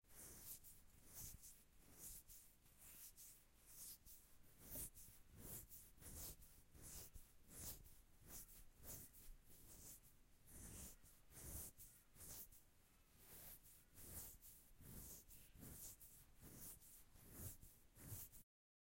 Short nails scratching skin. Recorded using Zoom H6 with XY capsule.